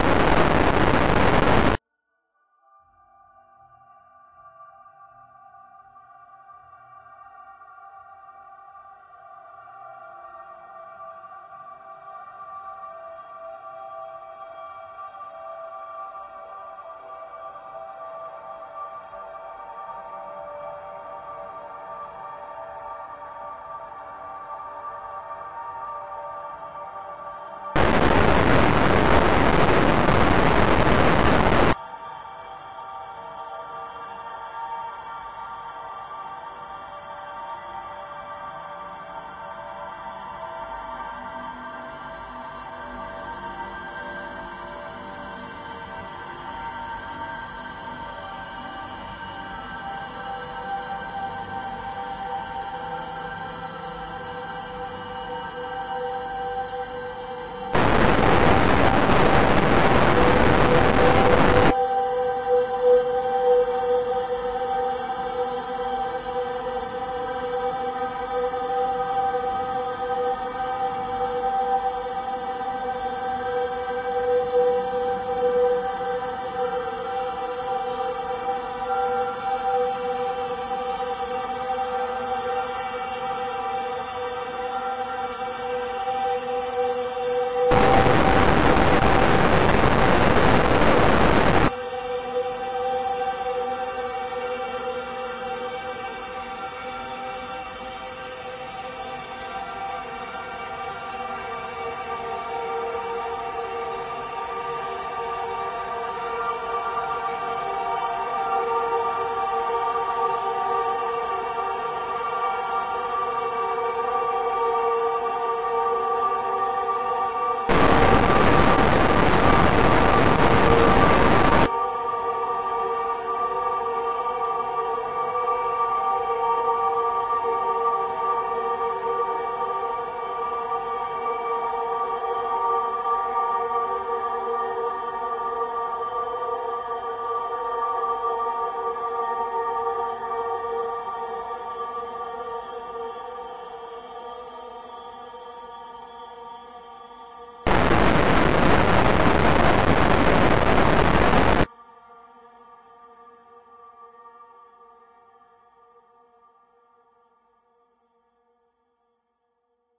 LAYERS 012 - Carbon Based Lifeforms is an extensive multisample package containing 128 samples. The numbers are equivalent to chromatic key assignment covering a complete MIDI keyboard (128 keys). The sound of Carbon Based Lifeforms is quite experimental: a long (over 2 minutes) slowly evolving dreamy ambient drone pad with a lot of subtle movement and overtones suitable for lovely background atmospheres that can be played as a PAD sound in your favourite sampler. The experimental touch comes from heavily reverberated distortion at random times. It was created using NI Kontakt 4 in combination with Carbon (a Reaktor synth) within Cubase 5 and a lot of convolution (Voxengo's Pristine Space is my favourite) as well as some reverb from u-he: Uhbik-A.